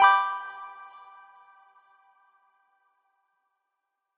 Correct Blips
achievement, application, beep, bleep, blip, bootup, click, clicks, correct, design, effect, fx, game, gui, hud, interface, intro, intros, menu, positive, sfx, soft, soft-beep, soft-click, sound, startup, success, ui